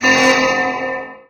Sounds used in the game "Unknown Invaders".
But these specific ones, were very remixed, changed, etc, etc... to fit to the game, and to give a "different" feeling.
space, game, ufo, ship, alien